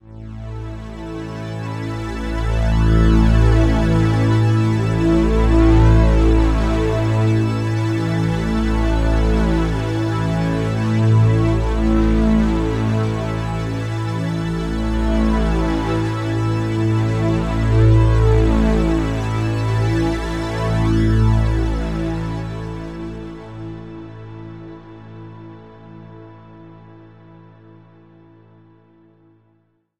A texture I did in MetaSynth with a fair amount of harmonic motion.